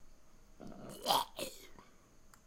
zombie uh oh
Part of the sounds being used in The Lingering video game coming soon to PC. Created using Audacity and raw voice recording.
Apocalypse, Creature, Growl, Horror, Moaning, Monster, PostApocalypse, Roar, Scary, Scream, Survival, VideoGame, Zombie